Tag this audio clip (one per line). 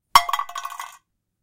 soundeffect
crash
tin-cup